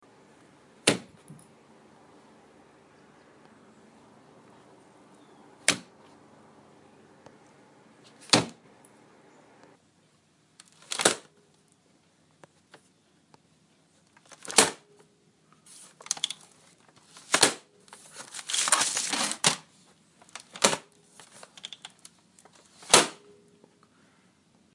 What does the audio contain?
Laptop Shut
The sound of closing a laptop computer.
close-computer, close-laptop, shut-laptop